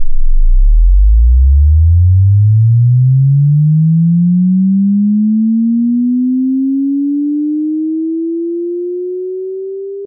A Sine Wave Sweep that sweeps from 10Hz-400Hz made using Audacity
Originally made to test out my cars subwoofer